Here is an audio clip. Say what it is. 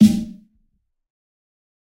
fat snare of god 020
This is a realistic snare I've made mixing various sounds. This time it sounds fatter
kit, drum, god, snare, fat, realistic